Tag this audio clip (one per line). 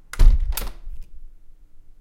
close
closing
home
opening
plastic-window
window